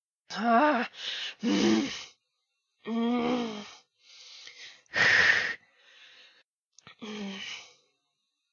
voice of user AS026857